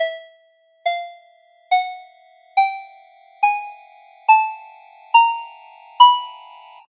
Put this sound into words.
20 ASCEND 8VA
20, 3, 8va, alert, ascend, cell, cell-phone, free, jordan, mills, mojo-mills, mojomills, mono, phone, ring, ring-alert, ring-tone, tone